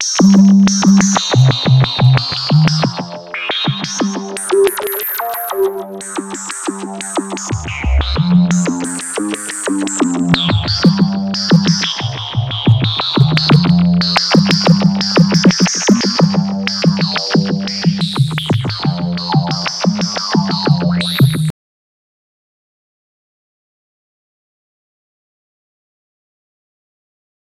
Nord Lead 1 Bass 2
Nord Lead 2 - 2nd Dump
ambient, backdrop, background, bass, blip, dirty, electro, glitch, idm, melody, nord, resonant, rythm, soundscape